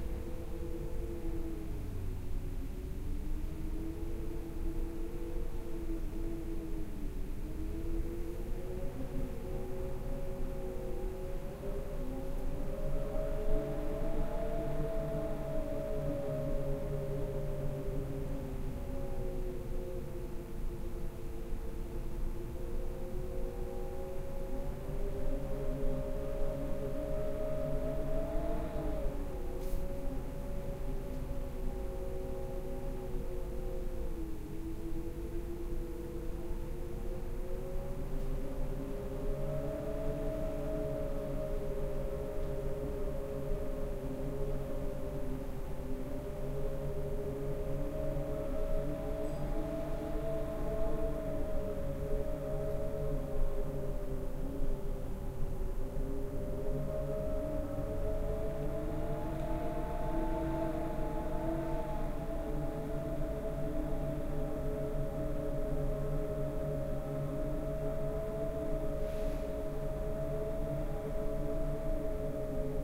wind howl window, slow 08
Slow, howling wind from window (2008). Zoom H2 internal mics.
wind, howl, window